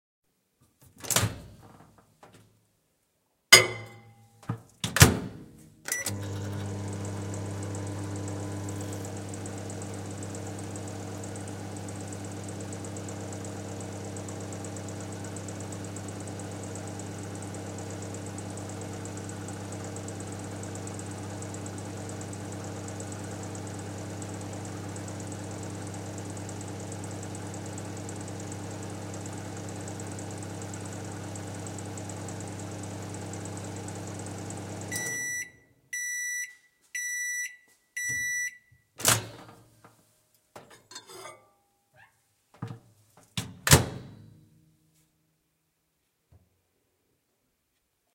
20x12x29 - Microwave Perc 01
The sound of opening microwave door, putting a cup into the microwave, turning it on, beeping when finished, opening door, then removing cup and then closing door